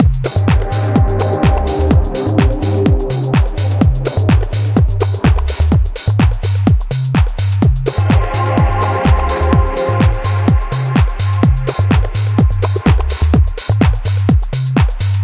trippy bgloop
A trippy ambient background stereo loop
loop, trippy, background, beat, ambient